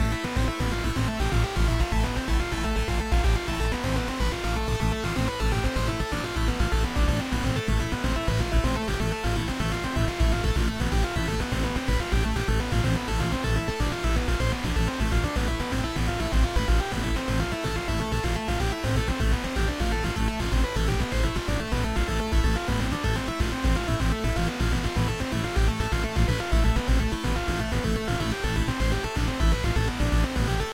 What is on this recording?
Sound created and edited in Reason 7.
match-music, video-game